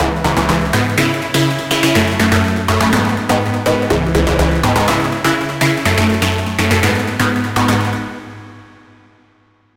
sydance5 123bpm
background, beat, chord, dance, dancing, dj, instrumental, intro, loop, pattern, pbm, podcast, radio, sample, sound, stereo, trailer, trance